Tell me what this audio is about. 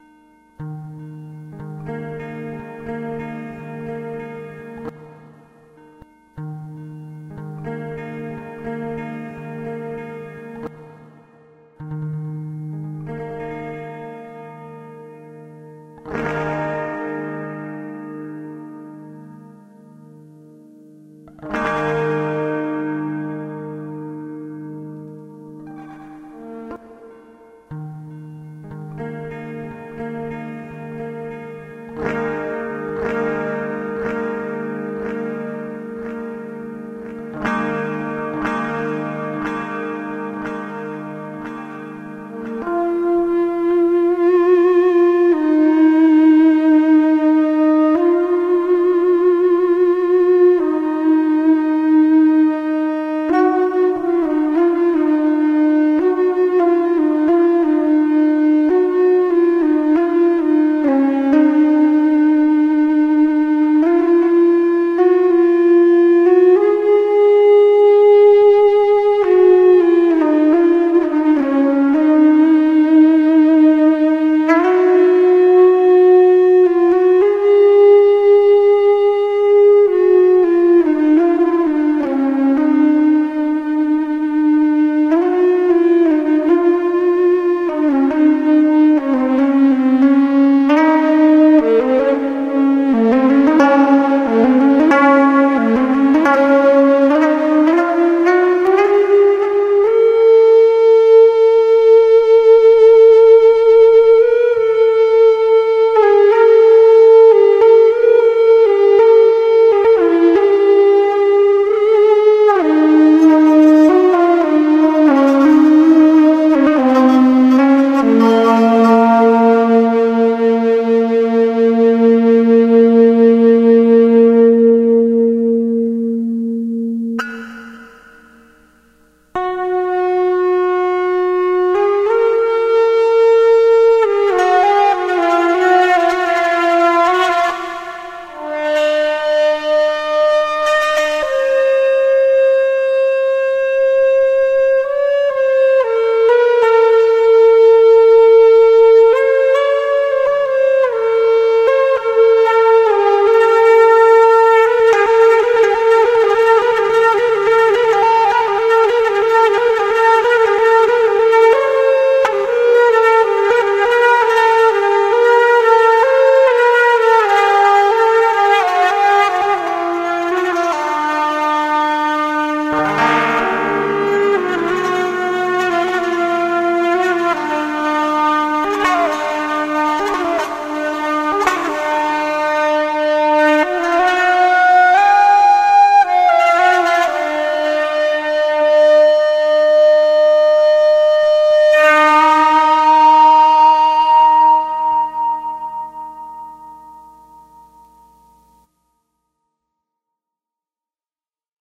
ebow-mando-alone
A mandolin in a custom tuning strummed a little and then bowed with a ebow. Root note D#
ebow, mandolin